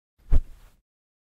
Grabbing a piece of fabric. Recorded with Stellar X2 condenser mic.
clothing, fabric, cloth, shirt, grab